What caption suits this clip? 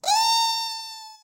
min- eeeee - Gsh
minion sacrifice sound in g sharp
sacrifice, minion, sound